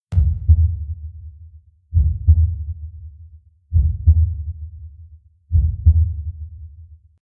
This pack of 'Monster' noises, are just a few recordings of me, which have lowered the pitch by about an octave (a B5 I think it was), and then have processed it with a few effects to give it slightly nicer sound.